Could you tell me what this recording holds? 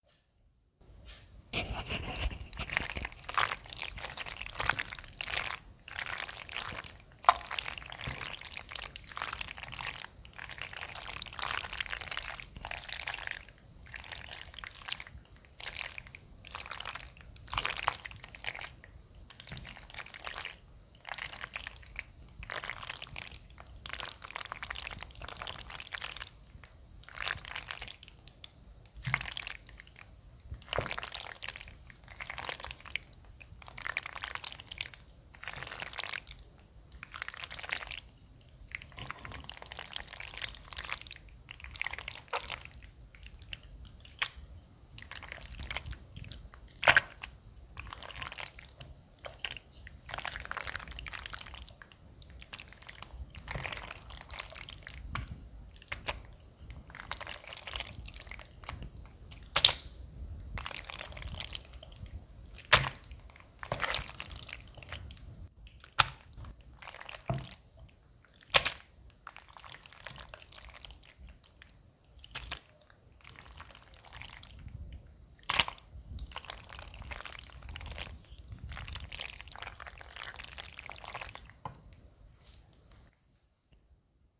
Fleshy Pasta Stirring Sounds
This meal of pasta with tomato sauce sounded fleshy, so I decided to record it. Sometimes you can hear the wooden spoon hit the pan. In the end, I even drop some of the past imitating a squish and a splash. Recorded using a phone and a lapel microphone.